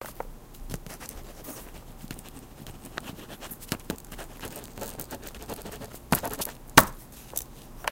pen write scribble dlugopis pisanie
pencil, pen, signature, scribble, draw, drawing, paper, write, writing